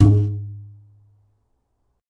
foam pluck 01
Plucking a rubber band that is strung over some styrofoam. Kind of sounds like a bad cello or something. Recorded with an AT4021 mic into a modified Marantz PMD 661.
pluck, styrofoam, lo-fi, cello, boing, rubber-band